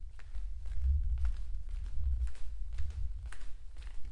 Audio passo de uma pessoa de chinelo